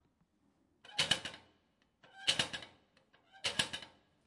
20141118 mailbox H2nextXY
Sound Description: Öffnen und schließen eines Briefkastens - Opening and closing of a mailbox
Recording Device: Zoom H2next with xy-capsule
Location: Universität zu Köln, Humanwissenschaftliche Fakultät, Studentenwohnheim, Erdgeschoss (Herbert-Lewin-Str., Gebäude 212)
Lat: 50°56'2"
Lon: 6°55'15"
Date Recorded: 18.11.2014
Recorded by: Patrick Radtke and edited by Vitalina Reisenhauer
2014/2015) Intermedia, Bachelor of Arts, University of Cologne
Cologne, University, Building, Field-Recording